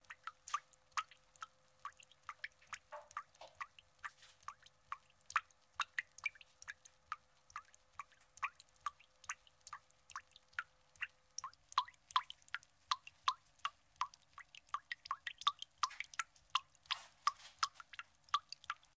water dripping from a leaky faucet into a pan of water